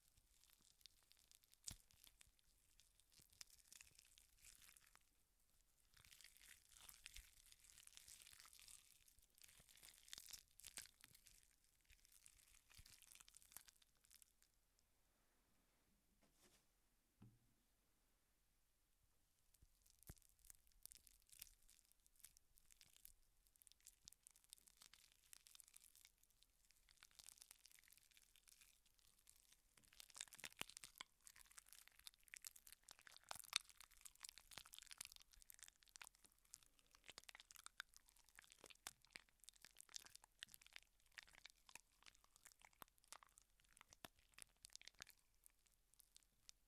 Gore loop2
Flesh, intestines, blood, bones, you name it.
blood, flesh, gore, intestines, tear